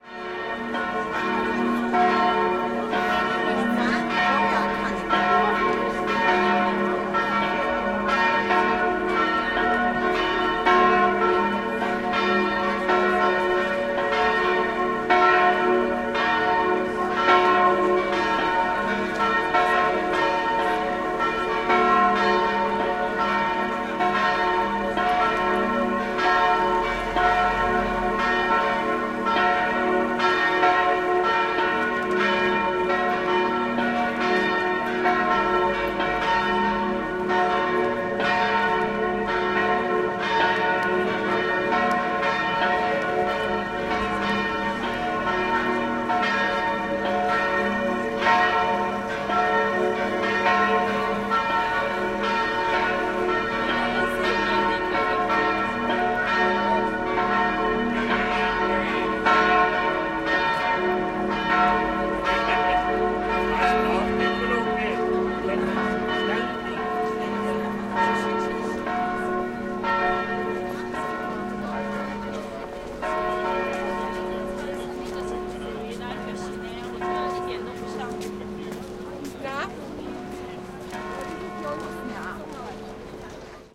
Innenstadt 1b Kirche
Recording around the "Stefansplatz" in vienna.
vienna, stefansplatz, bells